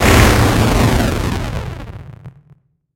Actually made with distorting, bitcrushing, and downsampling a recording of an electric drill (with this type of heavy processing the source material doesn't really matter much though).
No chips or tunes were harmed in the making of this sound.